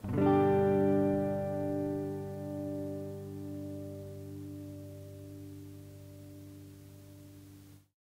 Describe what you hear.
Tape El Guitar 11
el, lofi, tape, collab-2, guitar, mojomills, Jordan-Mills, vintage, lo-fi